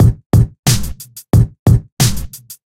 90 Atomik standard drums 05
fresh bangin drums-good for lofi hiphop